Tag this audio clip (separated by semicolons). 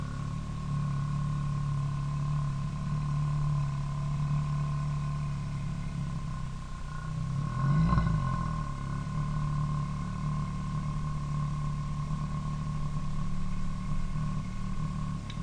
engine; revving; car; motor